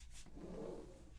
someone swinging in the jungle
wood, trees, swinging